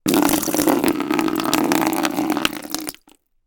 Water being poured out into a plastic bucket
splash, trickle, stream, water, fluid, bottle, pouring, pour, filling, aqua, Liquid, bucket